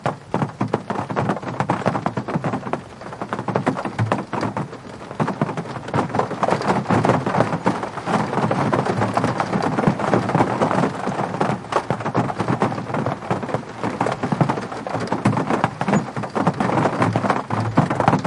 Rain From Car Interior Loop 1
Recording of rain hitting the car. Recorded from inside the car with Zoom recorder and external stereo microphones
deep, car, rain, weather, lightning, rolling-thunder, thunder-storm, strike, water, field-recording, rumbling, thunder, shower, rumble, raining, flash